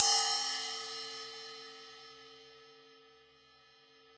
RC13inZZ-Bw~v06
A 1-shot sample taken of a 13-inch diameter Zildjian Z.Custom Bottom Hi-Hat cymbal, recorded with an MXL 603 close-mic and two Peavey electret condenser microphones in an XY pair. This cymbal makes a good ride cymbal for pitched-up drum and bass music. The files are all 200,000 samples in length, and crossfade-looped with the loop range [150,000...199,999]. Just enable looping, set the sample player's sustain parameter to 0% and use the decay and/or release parameter to fade the cymbal out to taste.
Notes for samples in this pack:
Playing style:
Bl = Bell Strike
Bw = Bow Strike
Ed = Edge Strike
1-shot, cymbal, multisample, velocity